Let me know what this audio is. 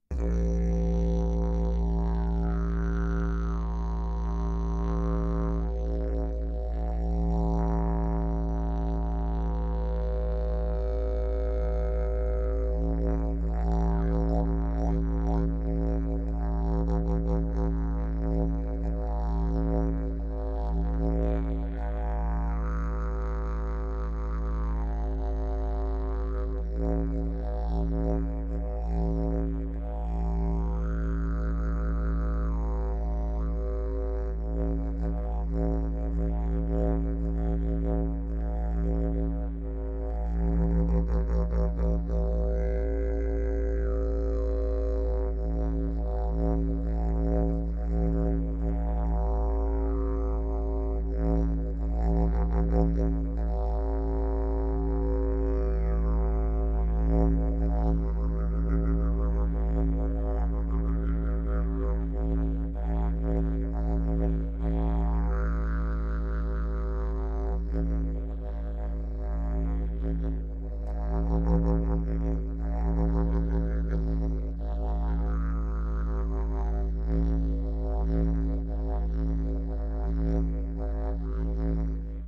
Sound of DIY didjeridoo. Recorded with two mics (Shure SM-7 & Oktava condenser mic). DAW - Reaper. Interface - Tascam US-1800. Didjeridoo is made of plastic plumbing pipes. Recorded at 25 July 2015.
wind, didjeridoo, tribal, didgeridoo